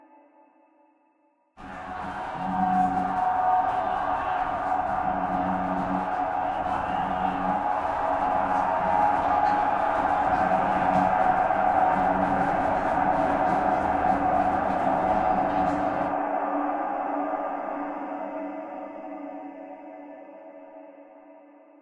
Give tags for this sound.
artificial
drone
multisample
pad
soundscape
space

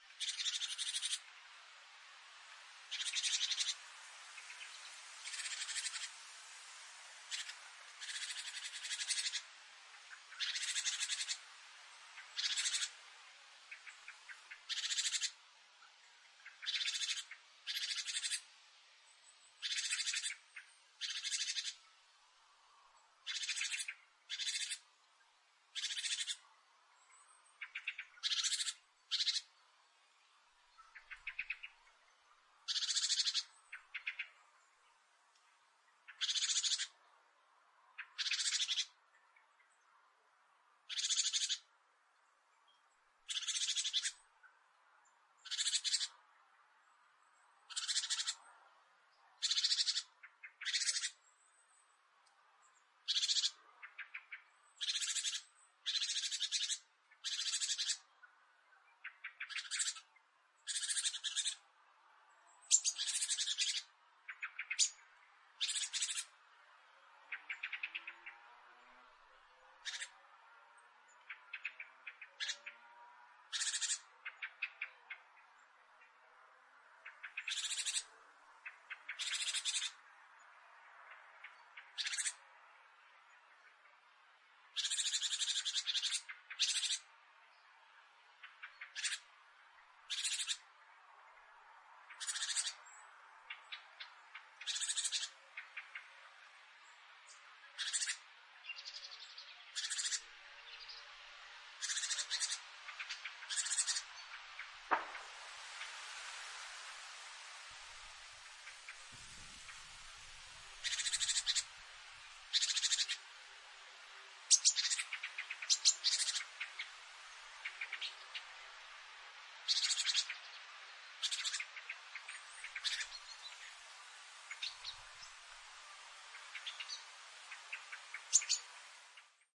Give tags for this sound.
field-recording
whistling
fethead
birds
bird
birdsong
zoom
h4n
whistle
garden
bushes
cm3
chirps
chirp
nature
line-audio
tweet

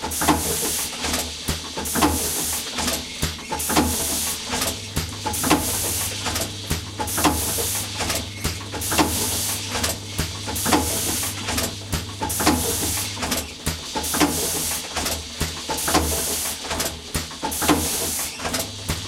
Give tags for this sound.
fabrik
factory
industrial
machine
machinery
manufactur
maschienenmusik
maschine
mechanical
motor
robot
robotic
whir

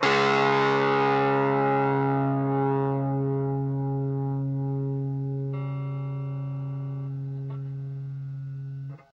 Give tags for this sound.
amp
guitar
miniamp
power-chords
chords
distortion

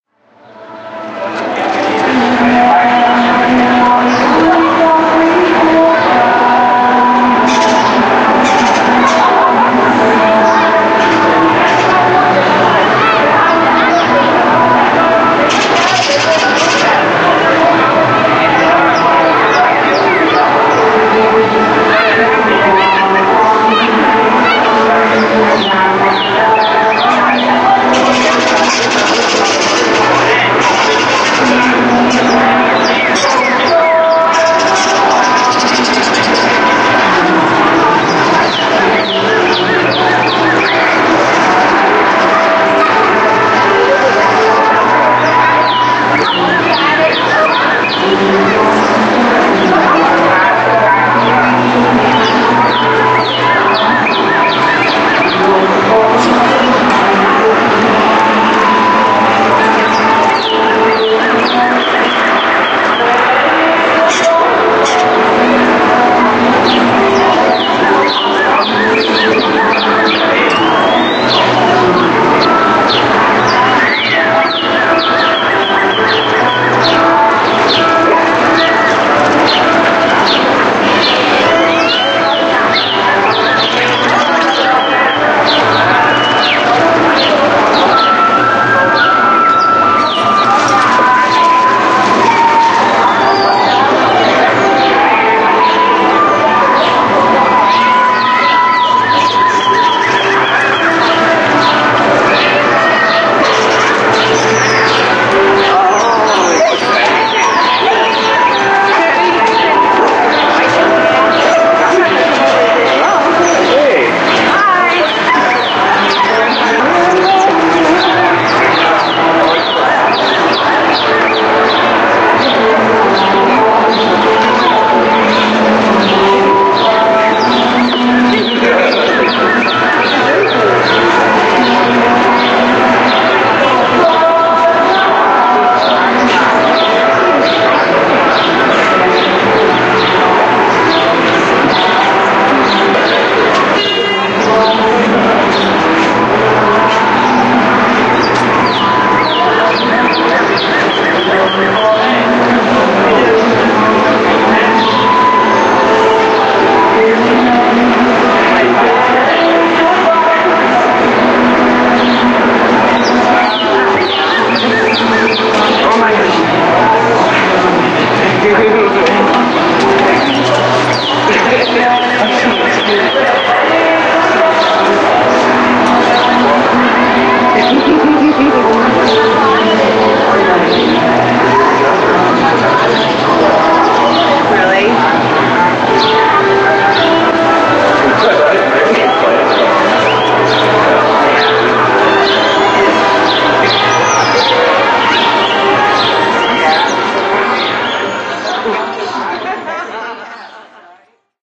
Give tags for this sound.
audio
field
sample
sound

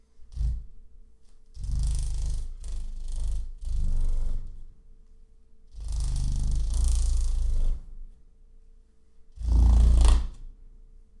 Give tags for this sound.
clatter
deep
low-rumble
machine
machinery
rubbing
rumble
unedited
vibration
vibrations

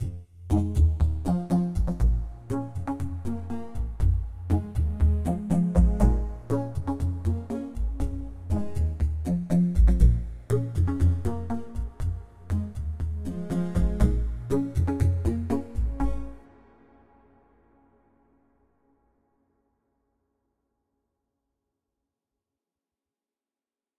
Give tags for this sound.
rythm
synth

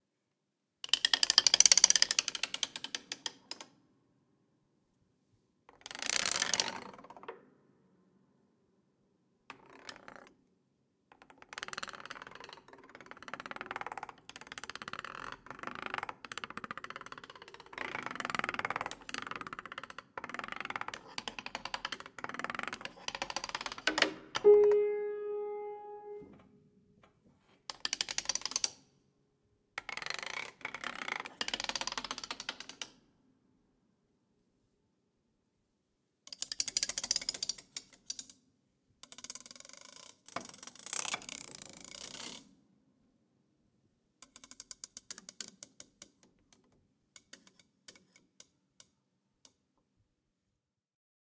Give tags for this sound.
Keyboard
Nails
Plectrum
over
piano

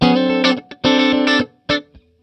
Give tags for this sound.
d7th
guitar

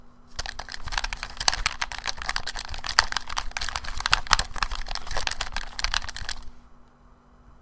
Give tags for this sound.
game
gaming
game-buttons
joystick
buttons

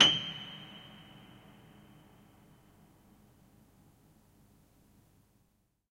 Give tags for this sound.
detuned
horror
old
pedal
piano
string
sustain

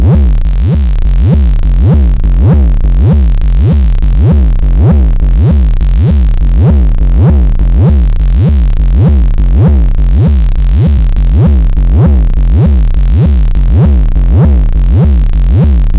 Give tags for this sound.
Analog
bass

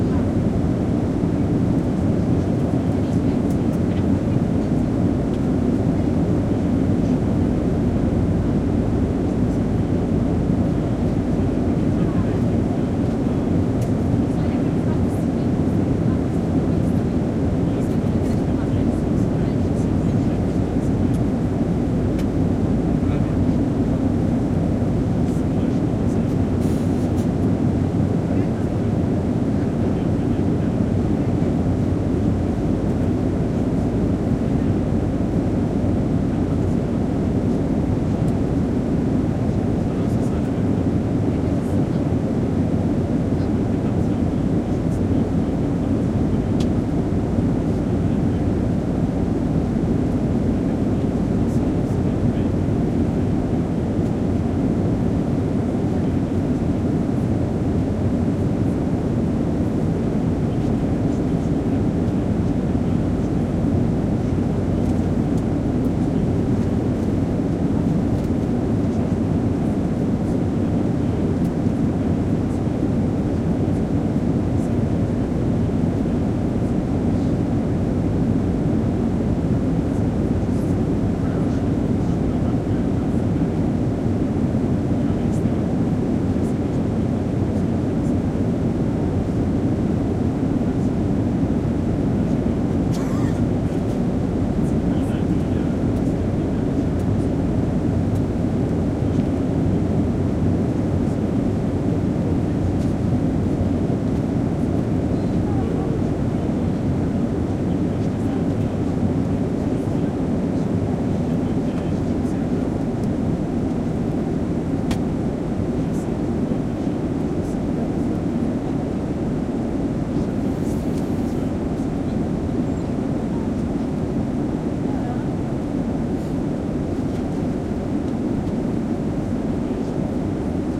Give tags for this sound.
airplane
takeoff
int
before

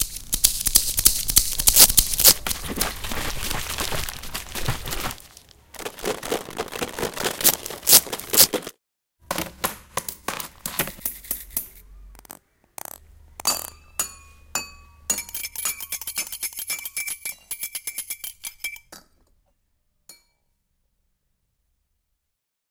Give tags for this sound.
soundscape
santa-anna
spain
cityrings

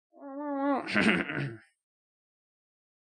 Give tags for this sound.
final
grampy
tos

